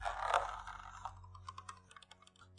A bow being slowly drawn into firing position.